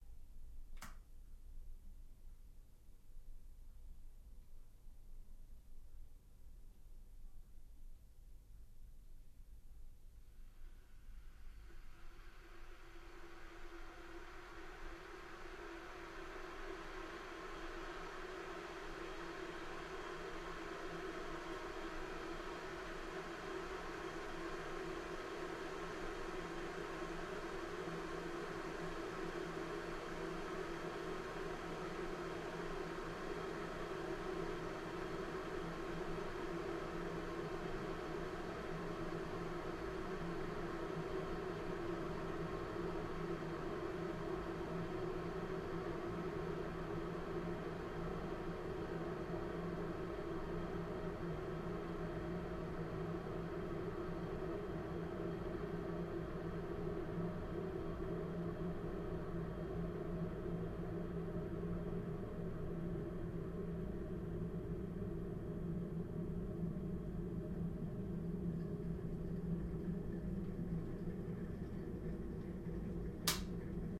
kettle D monaural kitchen
Recordings of kettles boiling in a simulated kitchen in the acoustics laboratories at the University of Salford. From turning kettle on to cut-off when kettle is boiled. The pack contains 10 different kettles.
high-quality, kettle